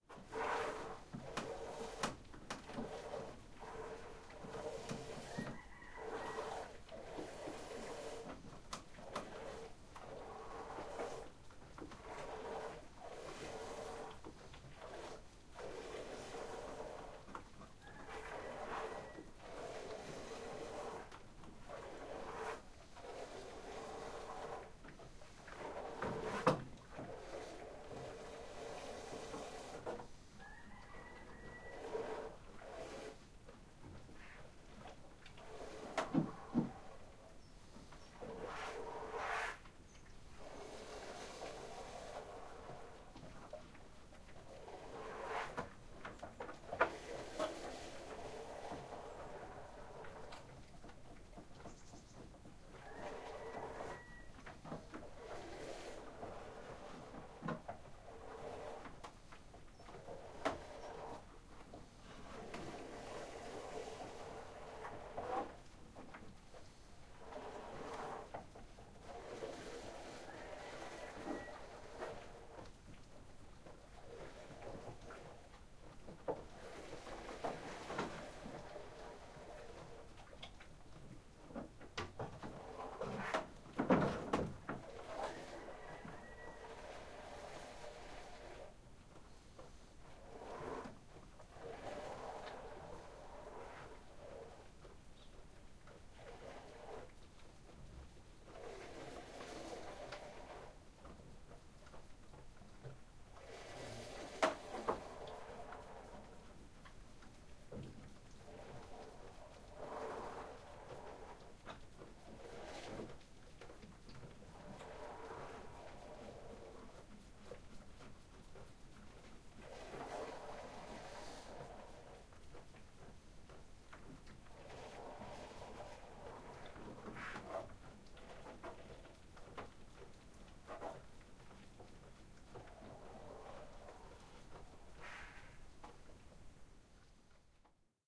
goats milking in plastic bucket 9 + cock chanting
Farmer milking a goat by hand. Bucket is half-full of milk. Goats and bucket stands on a wooden platform. A rooster is chanting form time to time outside the farm.